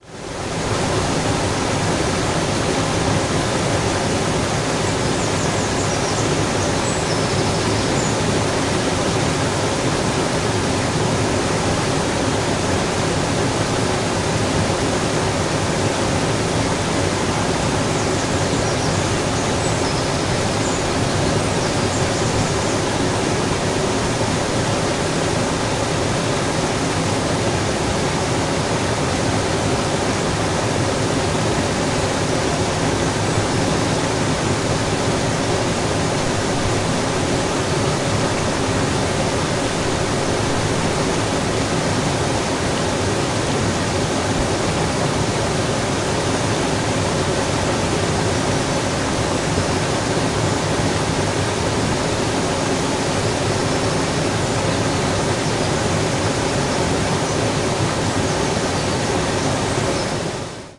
Water running through weir
Water running through a small weir - recorded with Olympus LS-11
water, river, flows, stream, weir